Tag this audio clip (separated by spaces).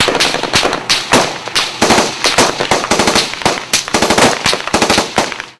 schuss sniper army rifle patrone military shot agression war pistol attack canon fight weapopn